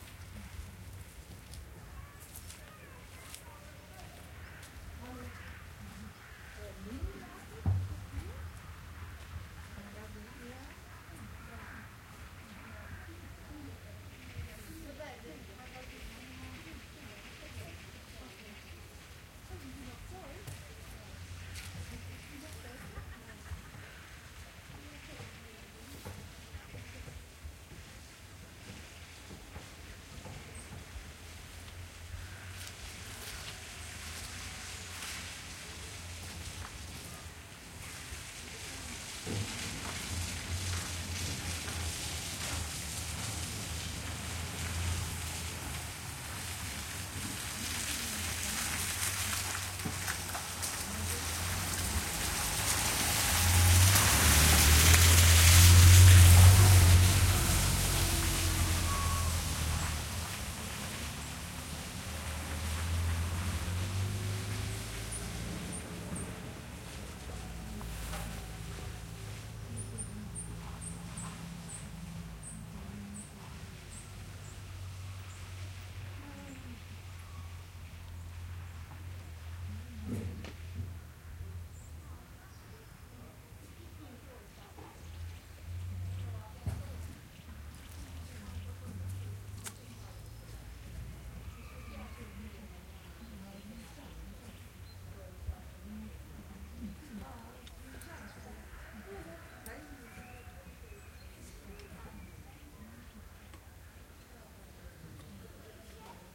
201006 Tichindeal VillageOutskirt Evening st
An early autumn evening on the outskirts of the Transsylvanian village of Țichindeal/Romania. Crows and jackdaws can be heard, as well as some villagers talking and a car passes on the muddy street in the middle of the recording.
Recorded with a Rode NT-SF1 and matrixed to stereo.
Romania, Transsylvania, countryside, field-recording, birds, ambience, people, rural, village